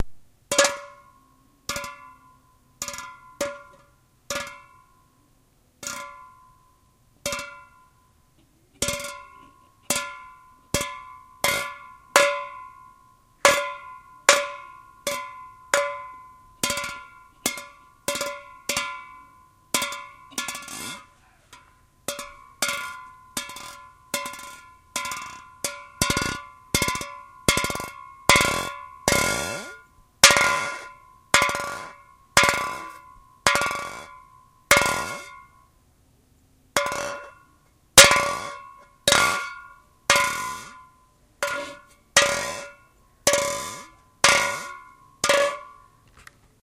Tin can again....